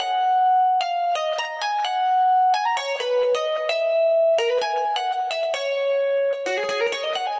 130BPM
Ebm
16 beats